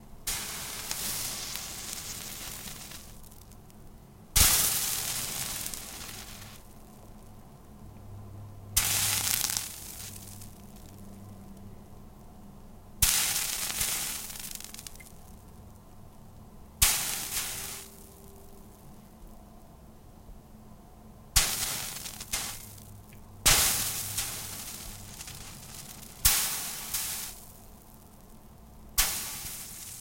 Single drops of water hitting a red hot frying pan. Some drops were let sizzle in the center of the pan. Others drops skittered, rolling off the edge of the pan while holding it upside down.
Recorded with an Audio Technica ShotGun Mic.
This is my first set of many recordings I plan to upload. In the future, I'll be more conscious of subtle sounds creeping in through the open window ;) The extraneous sounds in these recordings are minimal background noise I noticed after the recording. I'll search for tips on recording w/ shotgun mic and shoot for more pure sound for next upload.
water, hiss, boil, noise, snare, sizzle, beat